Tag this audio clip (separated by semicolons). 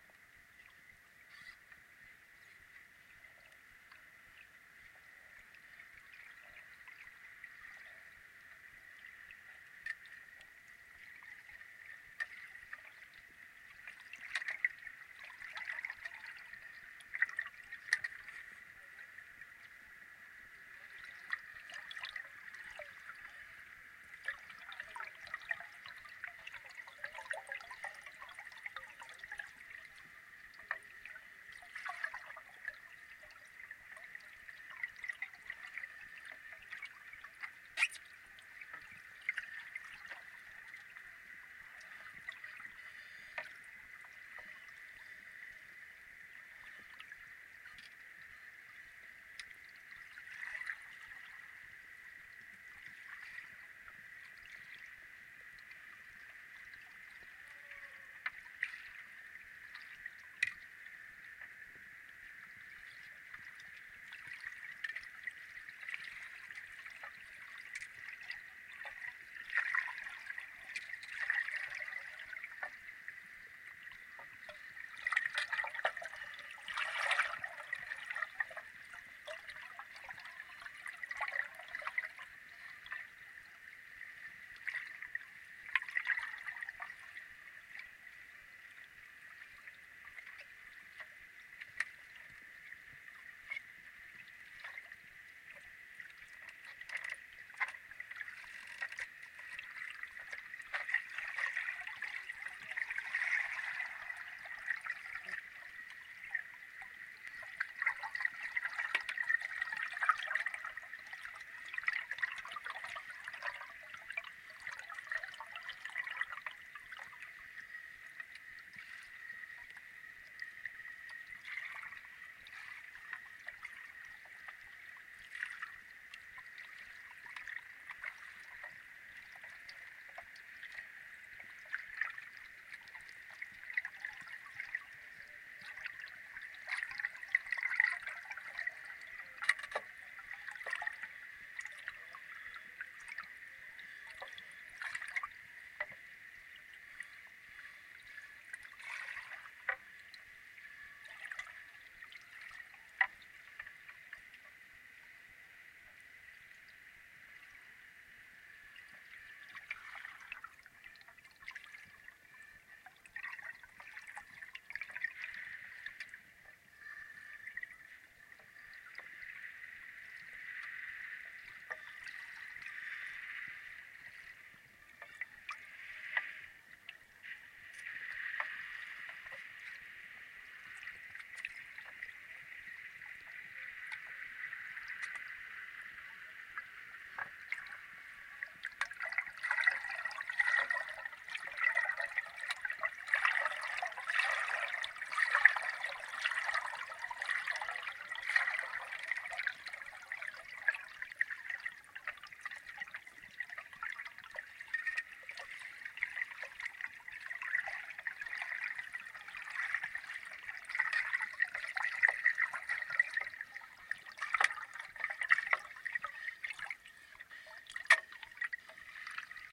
venice; Hydrophone; gondola